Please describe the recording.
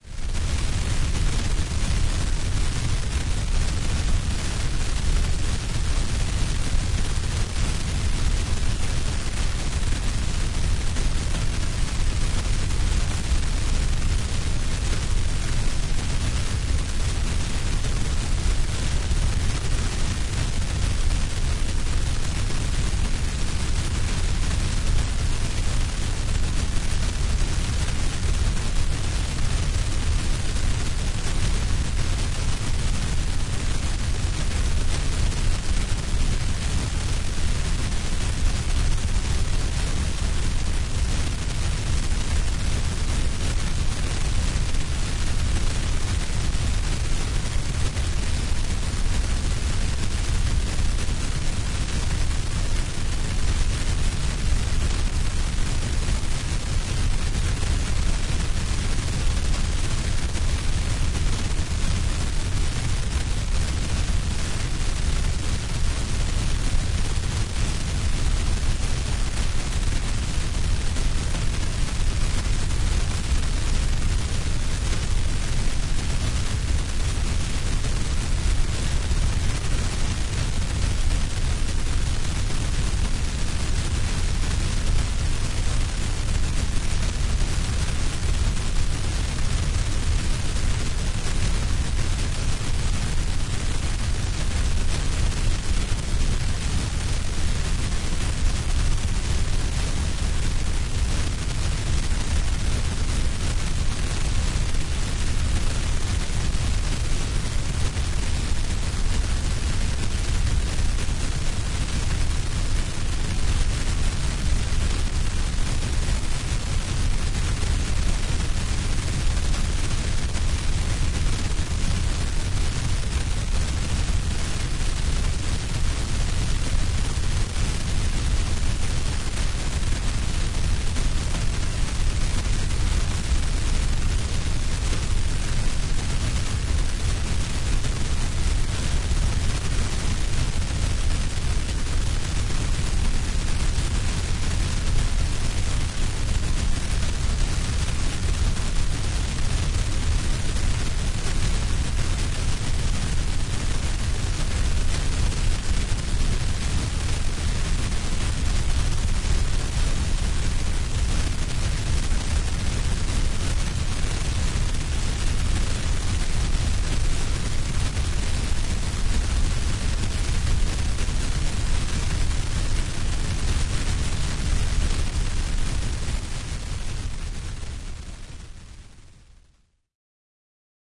Created with brown noise and a series of saturation and EQ plugs.